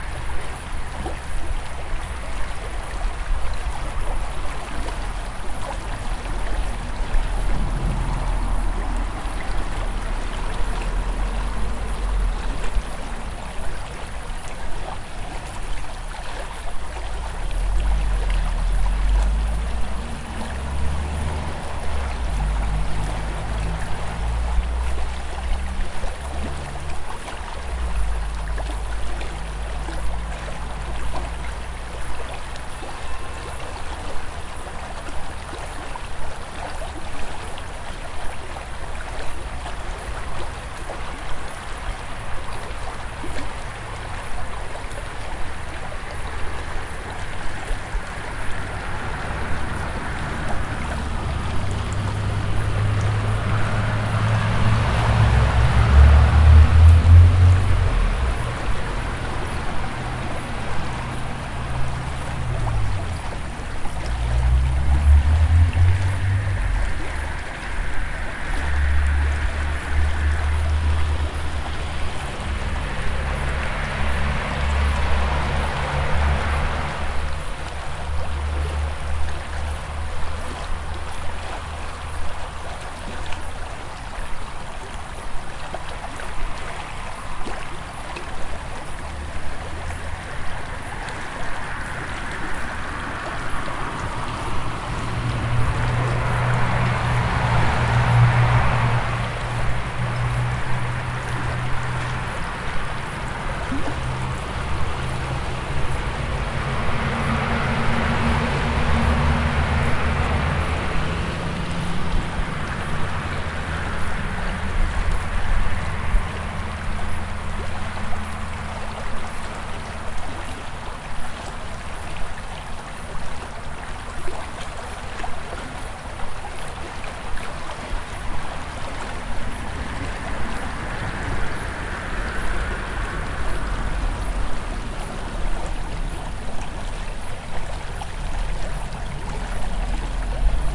river kocher bridge
night atmosphere at the river Kocher, southern germany, around midnight, close to a bridge. water mixes with crickets. sometimes a car passes.
field, water, recording, cars, night